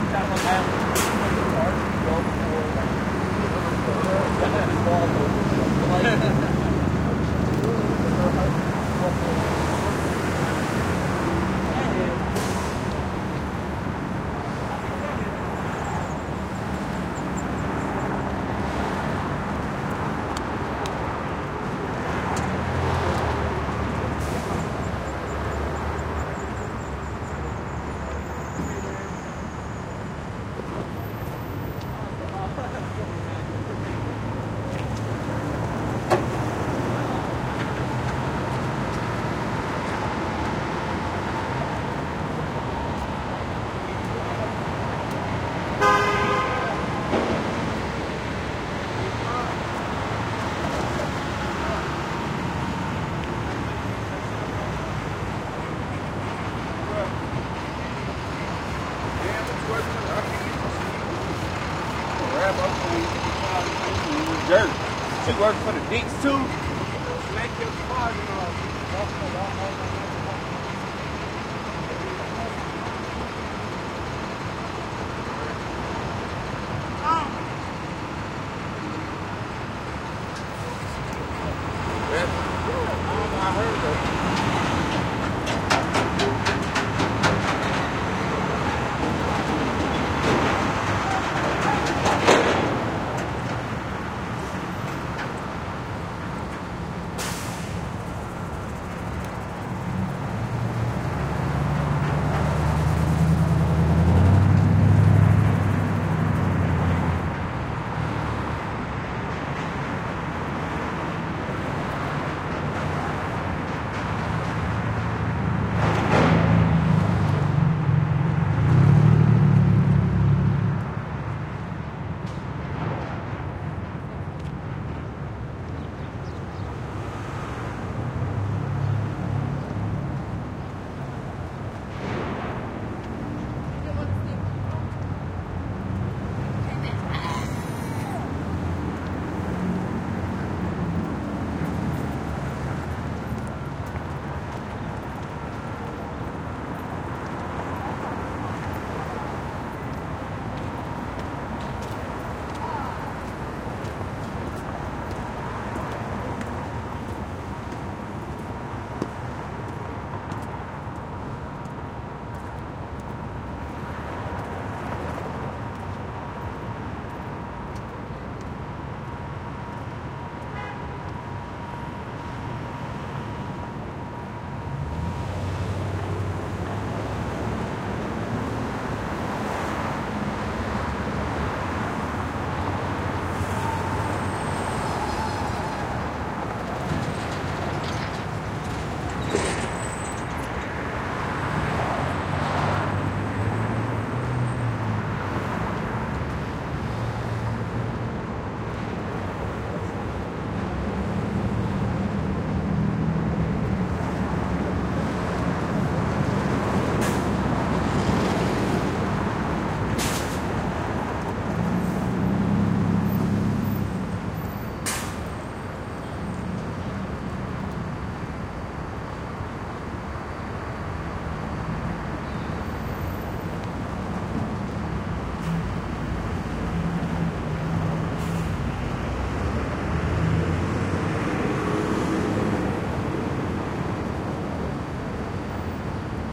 Louisville Downtown
Recorded at Jefferson Square Park. Recorded around 9am on 1/30/2018. Recorded with a Sound Devices MixPre-3 with two Rode NT5's in a X-Y setup.
cityscape, congestion, talking, ambient, Road, people, atmosphere, Public, Transportation, Street, trucks, design, Travel, Transport, Cars, Passing, field-recording, downtown, Traffic, Bus, walking, Louisville, sound, general-noise, soundscape, City, Kentucky, footsteps